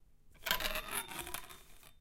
spinning weight
Spinning a 1KG weight on a golf club.
spin, golf, twist, club, spinning, OWI, movement, golf-club, rotate, twsiting